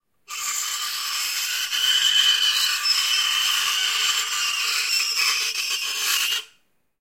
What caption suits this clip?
Fork scraping metal sound, like nails scraping sound